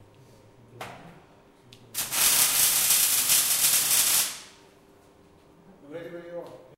industrial welding med
slight distance record of a mig weld. short weld duration
medium, weld